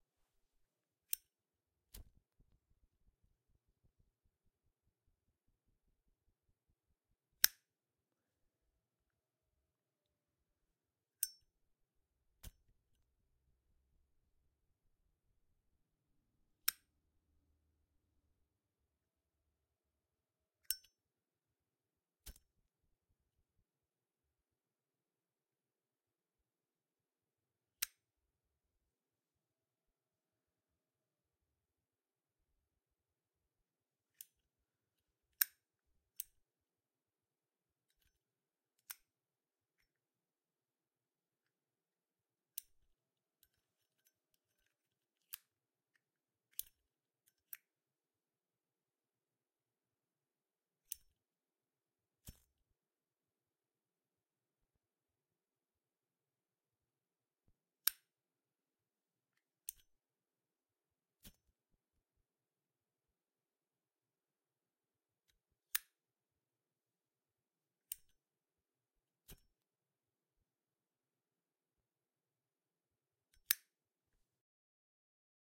Sound of Zippo lighter being opened, lit and closed. Recorded in stereo with 2 AKG C414 XLS.